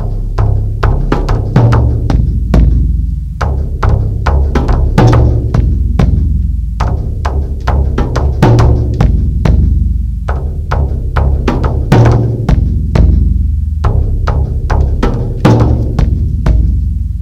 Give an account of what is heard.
continued beat variations on 4 drums, own design.
drums, experimental, music